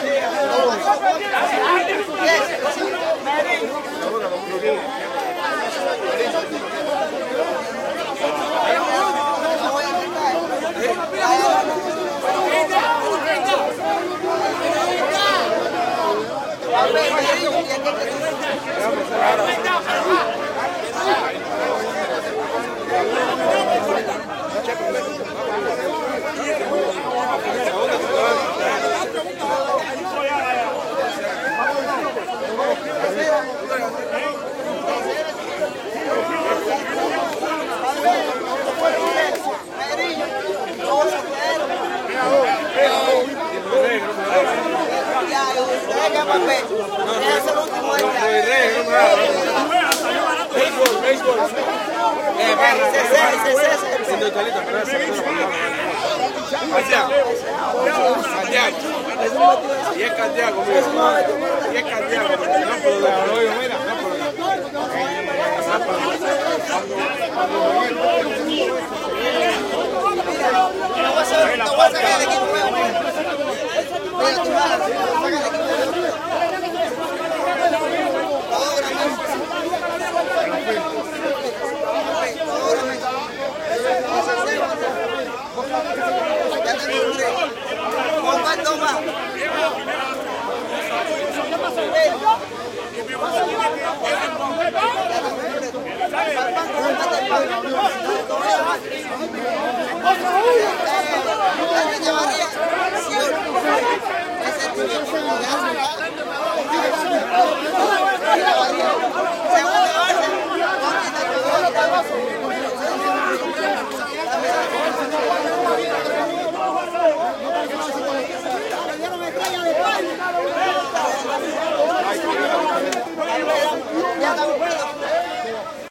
walla cuban men yell bball1 natural
crowd walla cuban men yell shout about baseball exterior
exterior, crowd, shout, walla, baseball, yell, spanish, men, cuban